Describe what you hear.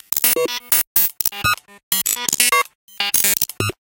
BuzzBleeps 125bpm04 LoopCache AbstractPercussion
Abstract Percussion Loop made from field recorded found sounds